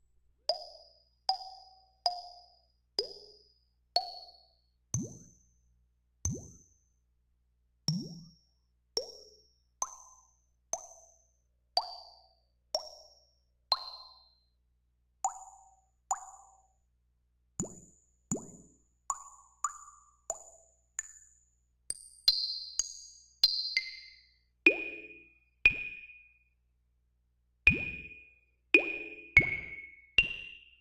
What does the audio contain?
Electronic water drop
Simulated water drops made on Korg EMX1, noise cancellation and edited through Audacity
drop, electronic, fx, water